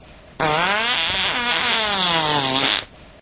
One of my longest & best farts ever!
aliens, beat, car, computer, explosion, fart, flatulation, flatulence, frog, frogs, gas, laser, nascar, noise, poot, race, ship, snore, space, weird